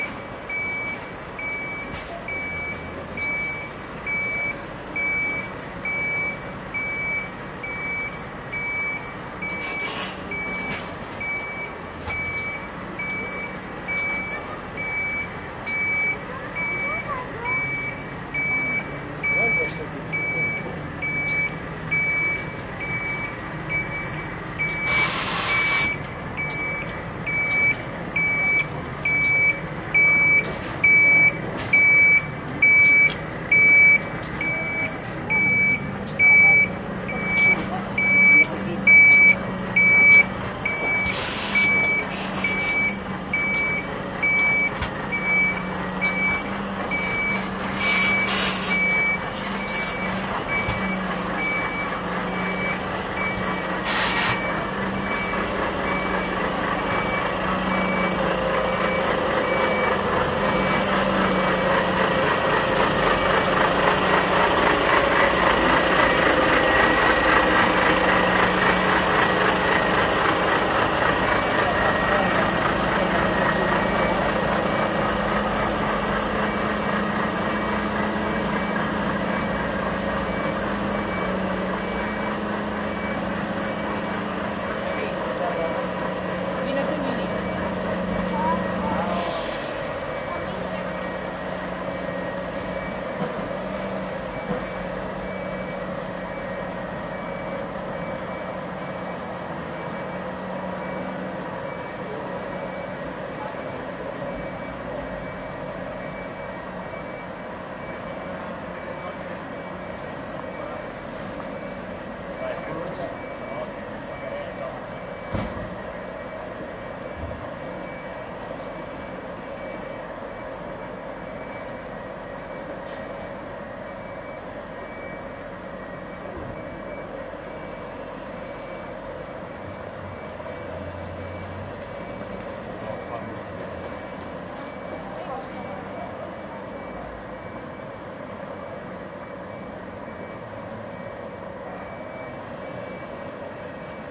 170719 Stockholm RiddargatanTruck F
A construction truck is slowly reversing off of the Riddargatan into the Nybrogatan in Stockholm/Sweden. It is a sunny morning and there is a fair amount of pedestrian traffic underway. The truck is driving right by the front of the recorder from right to left, it's reversing safety beeper predominant in the beginning, it's motor predominant at the end of the recording.
Recorded with a Zoom H2N. These are the FRONT channels of a 4ch surround recording. Mics set to 90° dispersion.
surround, Europe, urban, Stockholm, ambience, loud, construction, truck, field-recording, Sweden, people, street, traffic, beep, city